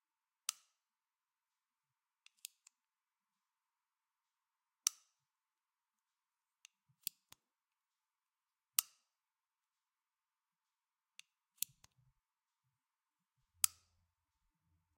pen click
click, clicking, pen